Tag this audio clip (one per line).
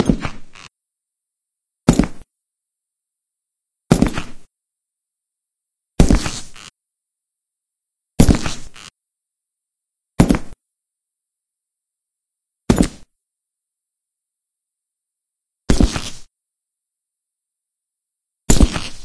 boot
boots
clear
concrete
floor
floorstep
foley
foot
footsteps
game
games
gaming
metal
shoe
sound
squishy
step
steps
walk
walking
wet